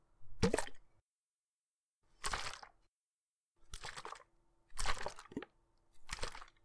Multiple sounds of some water being moved about.
Created by recording a water filled bottle being moved about.